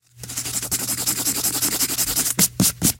Writing on paper with a sharp pencil, cut up into phrases.